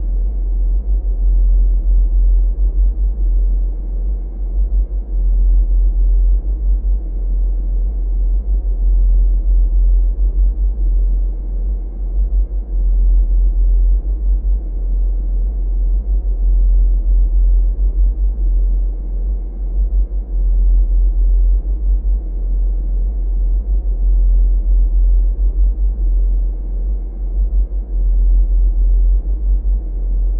ELEMENTS EARTH 01 Spaceship-Overview
Sound created for the Earth+Wind+Fire+Water contest
Two synthesized and layered sound
(i used Rob Papen Blue for both sounds)
one for the low deepness (sinewave)
one for the mid range frequencies
the attempt was that one to simulate
the sight of the earth from a spaces ship
This could be usefull for low background scoring